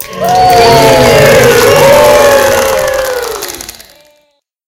cheering sound
using Voice Recorder on Samsung Galaxy Note 9 record my own voice.
then mix them using Audacity.
cheer, cheering, clap, clapping, glad, positive, reaction, win